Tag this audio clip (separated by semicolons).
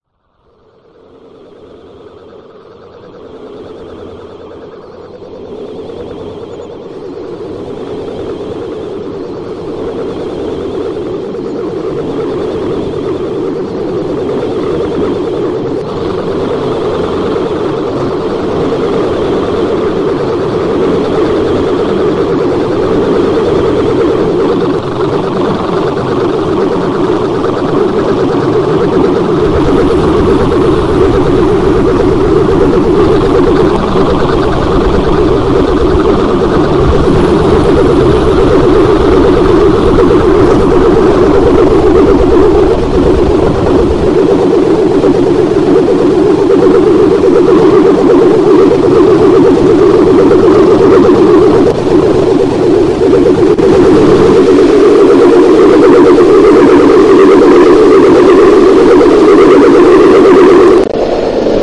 physics; spinning